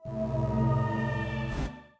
Player Death
A swell that plays when a player character dies in the Neuro MUD client for Ranvier.
Made for this purpose using a Korg R-3 and 3 layered patches.
Edited in Audacity.
death, game, korg, r3, sad, sfx, swell, synth